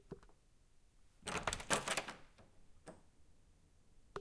Here's a quick sound bit of me opening my wooden door in my garage that leads to my downstairs basement. Recorded with a Zoom H4N.